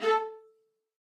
One-shot from Versilian Studios Chamber Orchestra 2: Community Edition sampling project.
Instrument family: Strings
Instrument: Viola Section
Articulation: spiccato
Note: A4
Midi note: 69
Midi velocity (center): 95
Microphone: 2x Rode NT1-A spaced pair, sE2200aII close
Performer: Brendan Klippel, Jenny Frantz, Dan Lay, Gerson Martinez